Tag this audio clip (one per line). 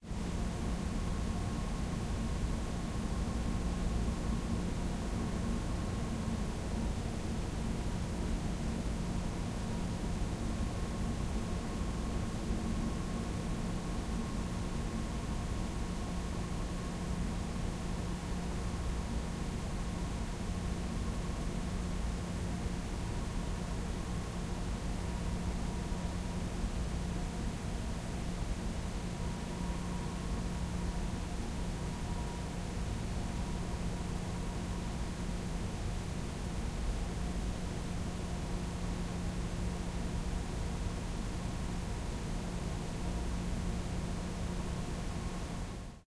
ambiance
ambience
ambient
apartment
atmos
atmosphere
bachelor
background
background-sound
departamento
general-noise
hum
indoors
o
pad
peque
room
small
sound
soundscape
tone
white-noise